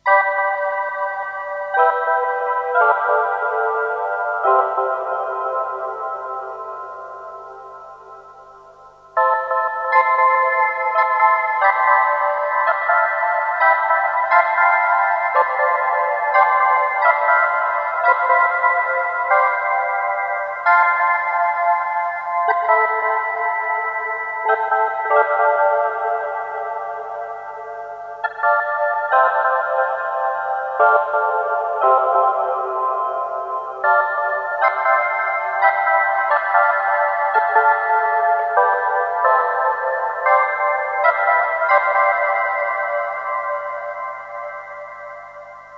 Boards of Canada Lead part1
BOC Lo-fi organ lead synth recording that sounds like Boards of Canada. A mix of digital and hardware instruments and reverb effect processors recorded and mixed in a DAW. Nostalgic organ timbre for cavernous decay church soundtracks.